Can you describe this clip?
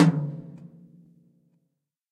Toms and kicks recorded in stereo from a variety of kits.
acoustic, drums, stereo